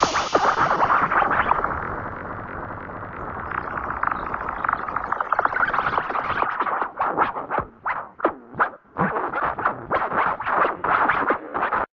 big bug bent